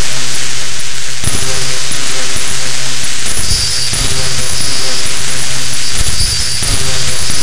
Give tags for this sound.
processed electronic noise fubar

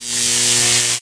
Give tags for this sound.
computer game power-up powerup spark weld welder

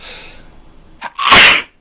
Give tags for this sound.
loud voice clear sneeze

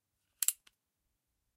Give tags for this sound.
cock cocking revolver gun

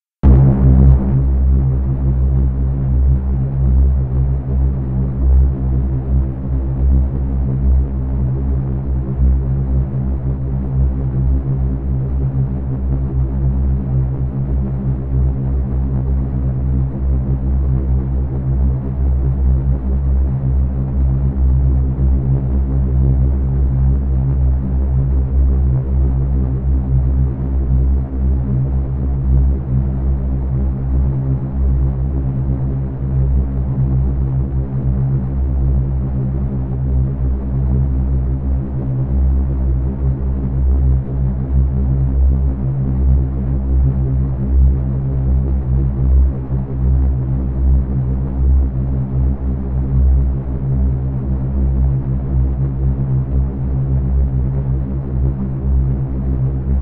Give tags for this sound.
drone engine noise sci-fi soundeffect spaceship

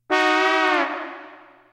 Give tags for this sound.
brass trombone

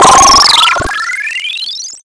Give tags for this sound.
artificial-intelligence computer gadget high high-tech In intelligence out robotic science-fiction scifi signing spaceship tech technology

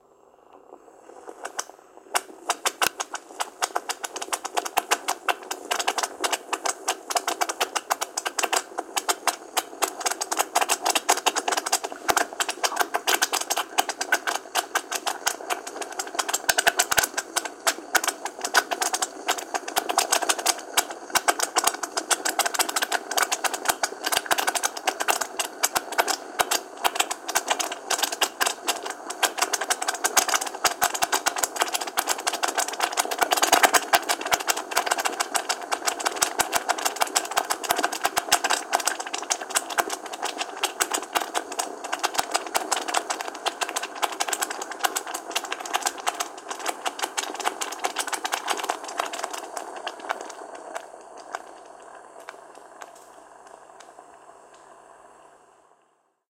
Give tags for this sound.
cooking sauce tomato

